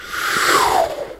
guy flying by
a whoosh! sound good for people flying across the room.
effect, whoosh